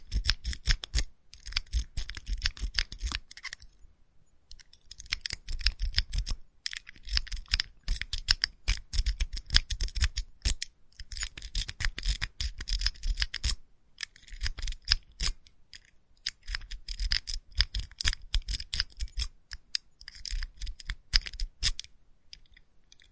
Lock 1 - Raking 2
Lock being raked open